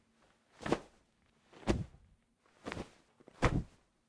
Hitting Bed with Pillow
pillowing hitting bed
bed, hitting, pillow